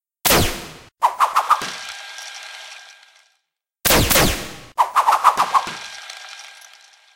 Grappling gun firing, followed by whipping sounds, and finished with a hit and tape winding noise.